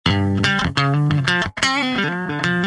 Recorded with Gibson Les Paul using P90 pickups into Ableton with minor processing.